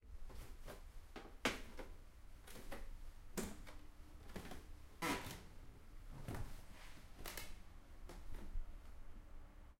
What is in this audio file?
Walking down stairs, from top floor to first floor
A recording of me climbing some stairs.
staircase, walk, stairs, footsteps, Walking, foot, field-recording, steps, stair, feet